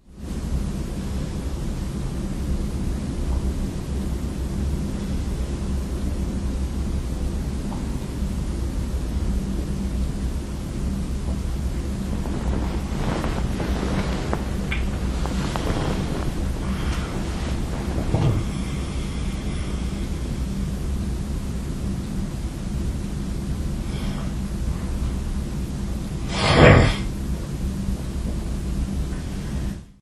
Moving while I sleep. I didn't switch off my Olympus WS-100 so it was recorded.